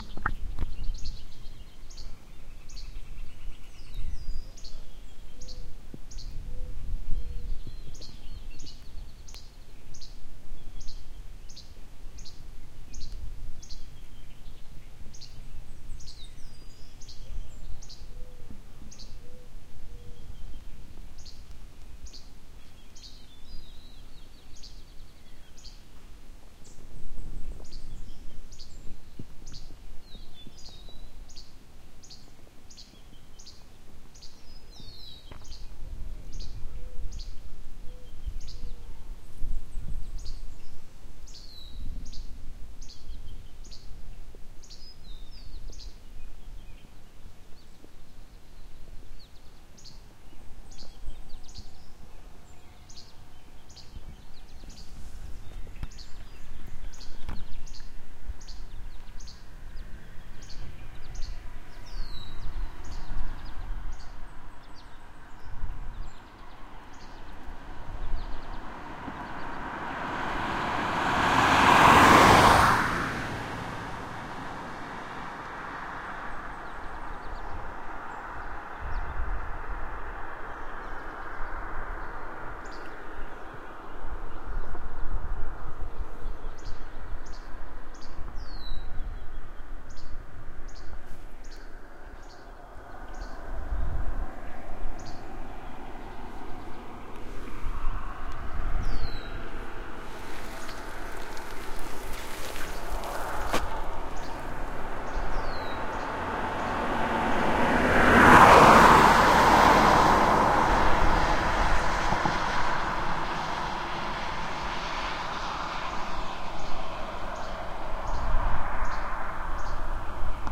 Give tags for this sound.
birds
country
passes
road
car

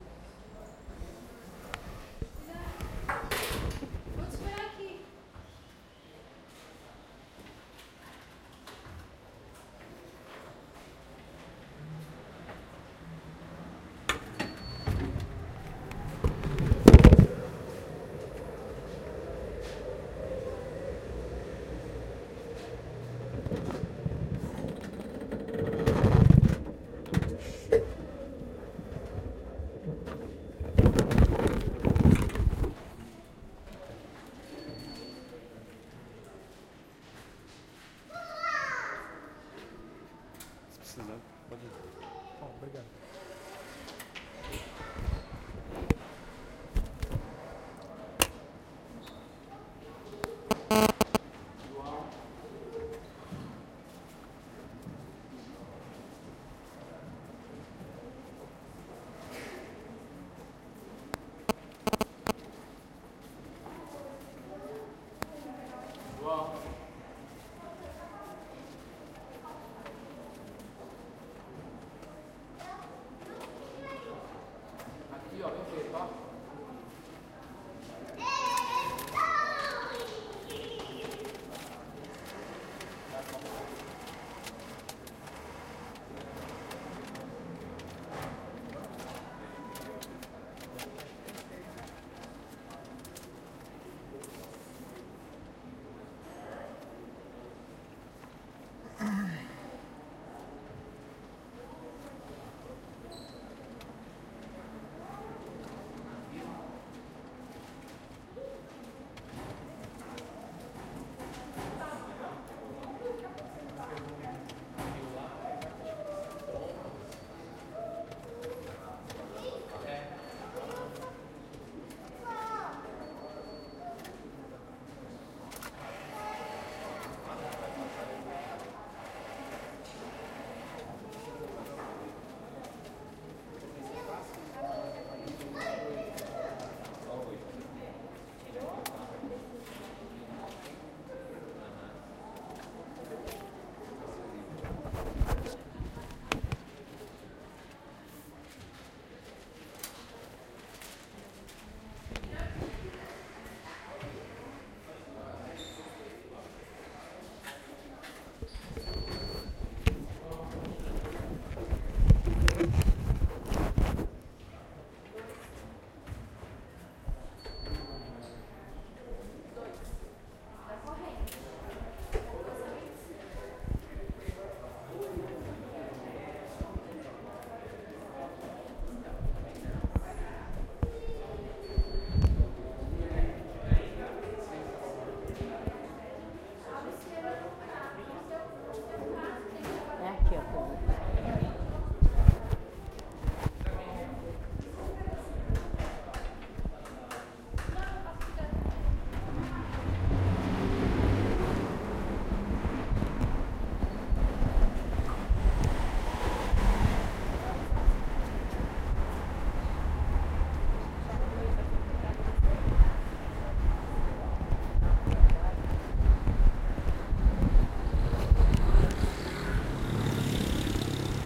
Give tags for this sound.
noise
atm
bank
field-recording
brazil

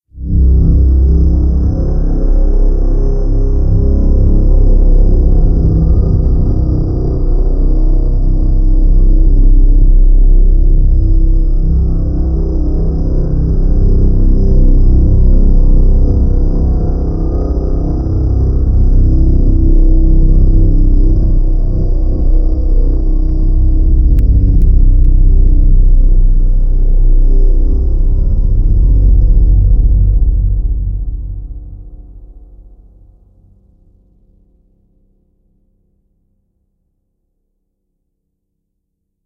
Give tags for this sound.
hollow
death
cavernous
aversion
dark
effect
atmosphere
ambience
crazy
dead
deep
disgust
abstract
fear
distorted
drone
depressed
horror
espace
glaucous
ambient
distortion
curved
bizarre

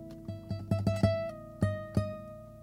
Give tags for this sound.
acoustic
guitar
soft

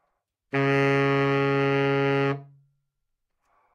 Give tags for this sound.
baritone
Csharp2
good-sounds
multisample
neumann-U87
sax
single-note

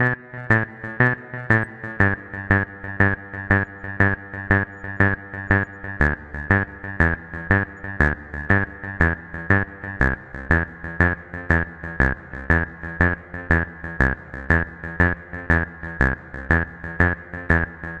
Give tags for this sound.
bpm
minimal
house
synth
bassline
120
electro-house
delay
electro

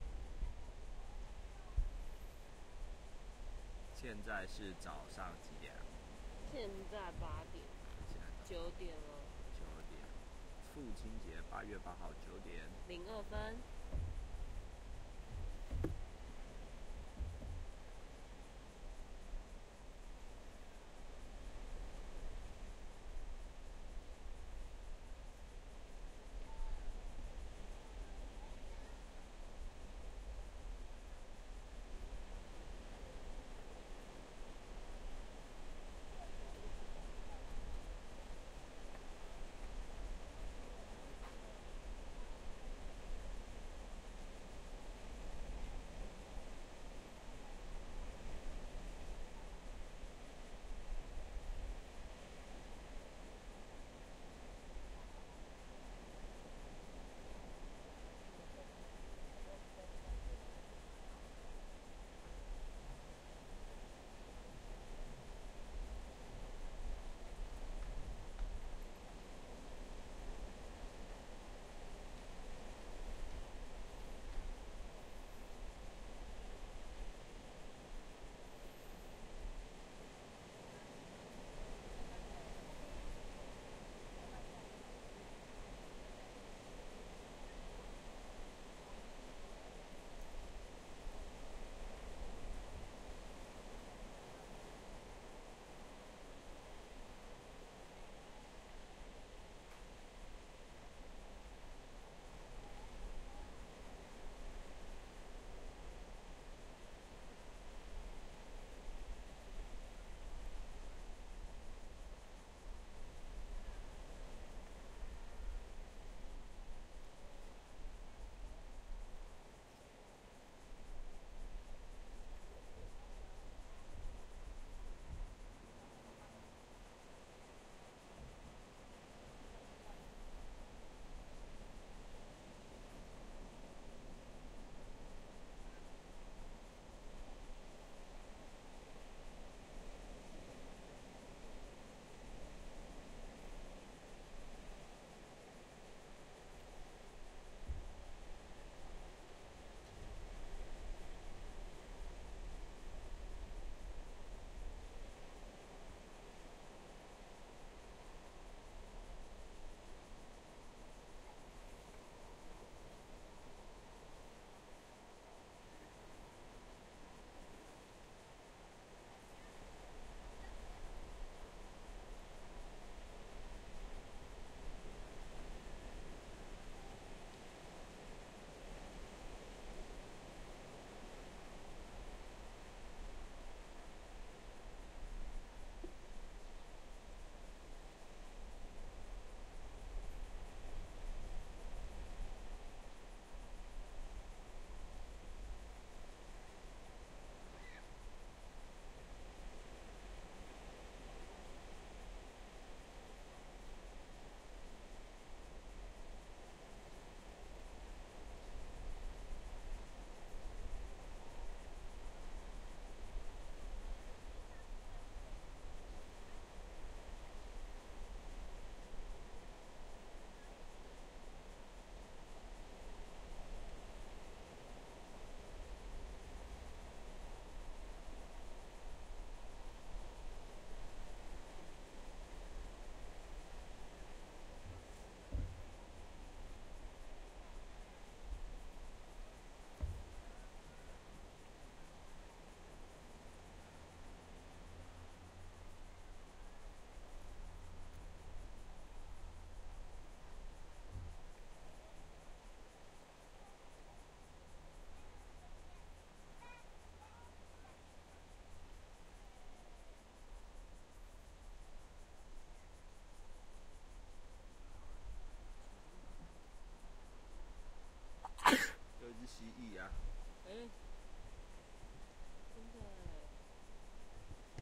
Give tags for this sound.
asia
beach
travel

